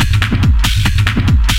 TECHNO LOOP 3
when i use distortion i try to have a clean-ish sound not all white noise sounding. good techno is subtle. compression is the key
techno, loop